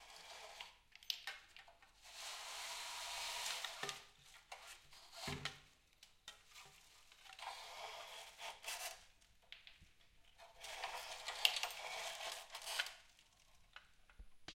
Blinds being pulled up and down at various speeds
A variation of sounds made using a small-sized blind set over a window. Various speeds and tempos used.
slow, blinds, tempo, moving, movement, roll, tug, bead, covers, speeds, beads, fast, rolling, OWI, pull, blind, pulling, sliding, slide, tugging